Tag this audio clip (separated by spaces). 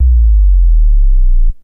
low thump boom bass big